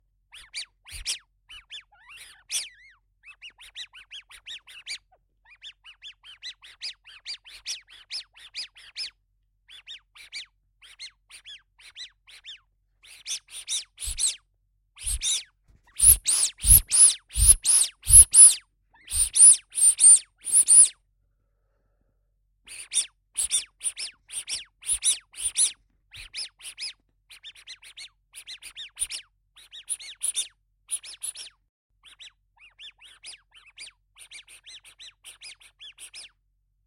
rubber ducky squeeze
Rubber ducky being squeezed.
Recorded with H5 Zoom with NTG-3 mic.
Please note: No rubber duckies were harmed in the making of the audio.
ducky, rubber, squeak, squeaker, squeeze, squeezing